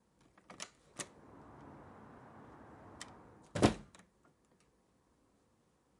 Door - Full 3
My front door recorded with AKG C414
close, open, door